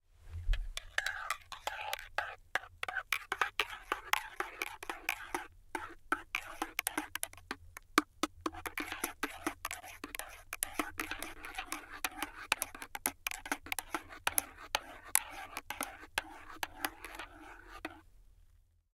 stirred mocha
Instant mocha (double choc mocha, to be precise) being stirred using a stainless steel teaspoon in a ceramic mug.
Recorded using a neumann TLM102 through a Focusrite Scarlett 8i6.
Some noise reduction applied in Adobe Audition.
coffee, cup, hot-chocolate, mocha, spoon, spoon-in-cup, spoon-in-mug-stirring, spoon-stirring, stir, stirring, stirring-hot-drink